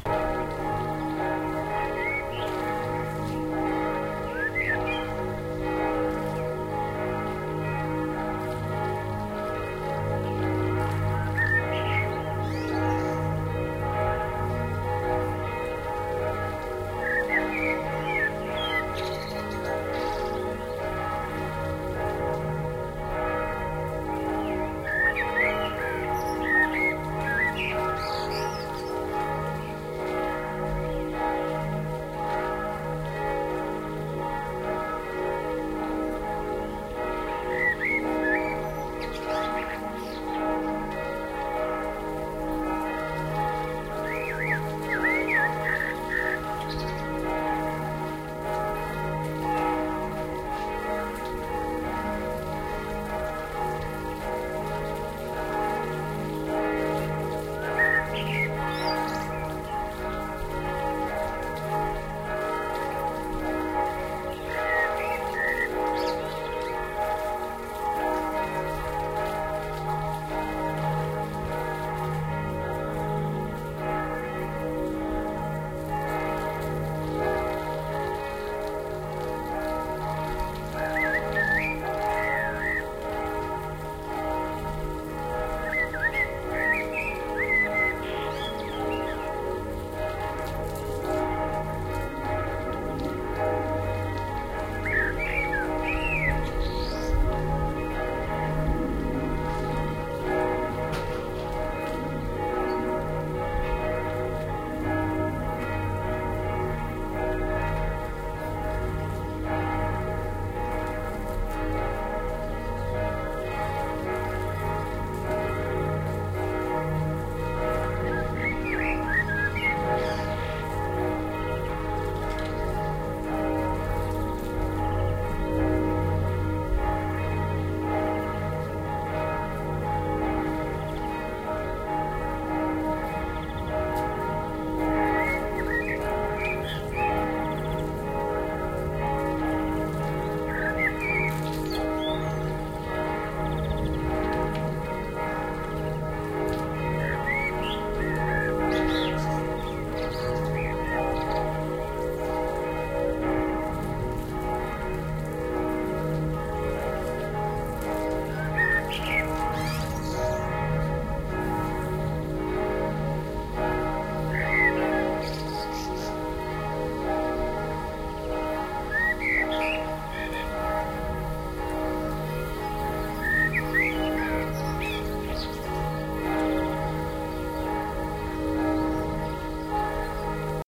Churchbells Blackbird Watering
Garden bliss, a blackbird
singing, those bells ringing and the sound of watering some plants. All
that was recorded with a Sharp MD-DR 470H minidisk player and the
Soundman OKM II in April 2007.
allotment, blackbird, churchbells, field-recording, garden